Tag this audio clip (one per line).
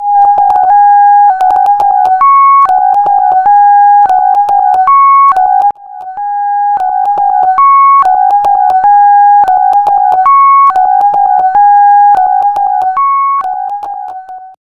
ambulance
horn
siren